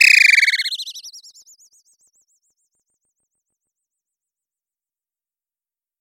Simple FX sounds created with an oscillator modulated by an envelope and an LFO that can go up to audio rates.
The first LFO starts almost at audio rates but the FM level was kept quite low. A second LFO at a slow rate was added.
Created in Reason in March 2014